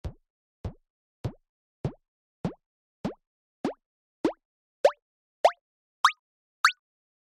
Synth Bubbles
Synthesized bubble sounds of ascending pitch, dry and rhythmically placed for easy chopping. Synth1 VST and compression / EQ used.
pop, bubbles